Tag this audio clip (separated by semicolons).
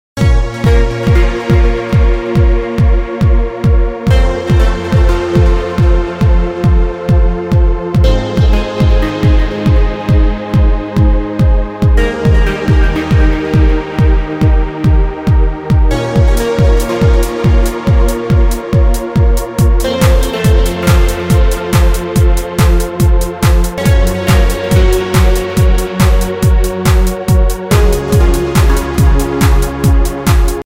ambieant; loop